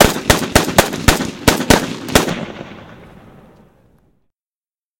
Rifle Shooting
Automatic weapon firing.